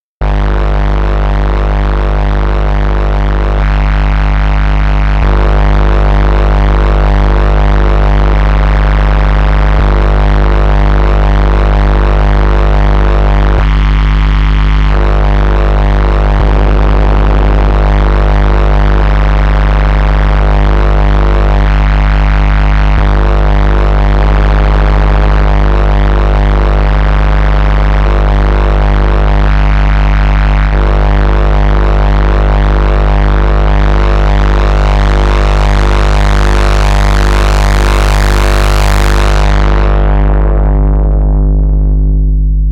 This sample was created using a Moog Sub Phatty and recorded into Ableton Live. The root is F and any harmonic variation in pitch was in the key of F minor. Enjoy!
phatty, sound